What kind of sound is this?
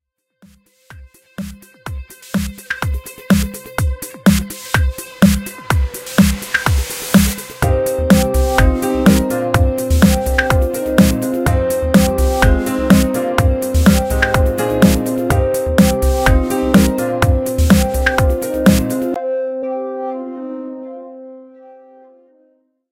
Sea World
My attempt at a short beat. Originally made for a friend. Decided to upload it here as well.